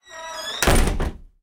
Door Close Sqeuak 01
Door closing with a creaking squeak
creak, lonng, unlock, squeak, close, door, lock, wood, screen